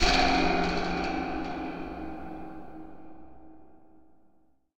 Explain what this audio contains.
Metal Spring Pluck (Horror/Suspense Sting)
Sound of a loose metal spring being plucked with heavy reverb, could be a good sting for a horror sequence.
reverb, impact, metal, suspense, spring, supernatural